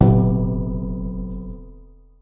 Metal Soft Impulse
Custom made metal percussion gentle beater recroded with high end preamps and contact mic
beater, close, hit, metal, metallic, percussion, soft